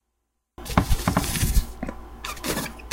The sound of a ball dropping, and a small creature sniffing. Was made using Laptop Microphone, and recorded with Audacity.
Recorded 26/5/2014